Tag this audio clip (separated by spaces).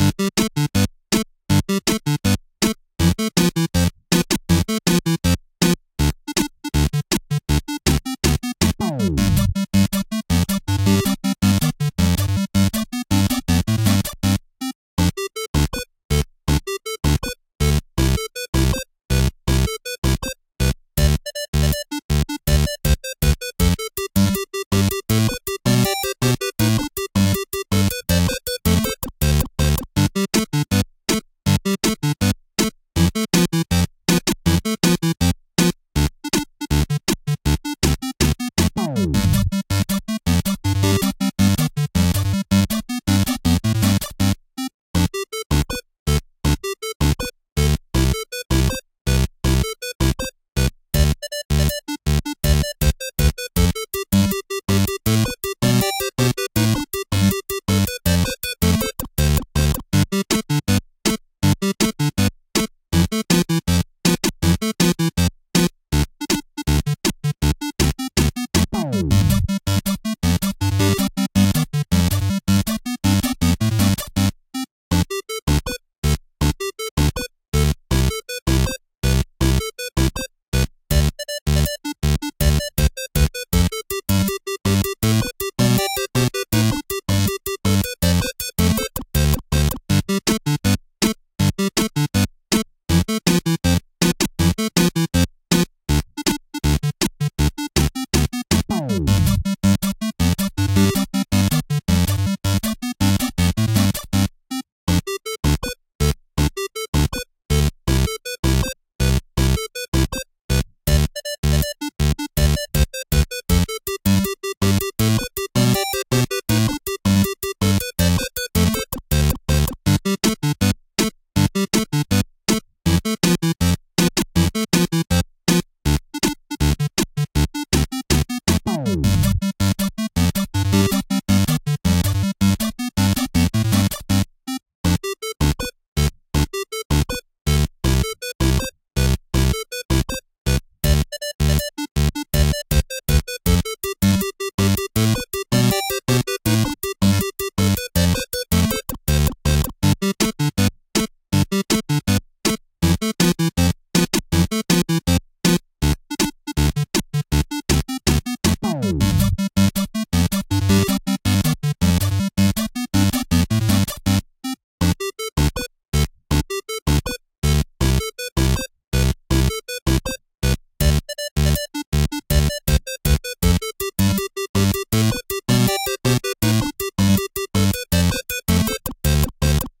8-bit 8bit aka Blix Bytes Fantasy Happy Lost Micah Moons Young